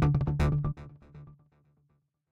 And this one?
I made this ringtone for my phone. It's yours now.